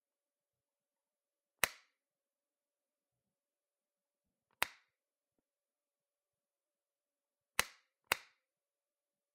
Floor switch 2
Lamp switch/ floor switch
button, click, lamp, light, mechanical, off, press, short, switch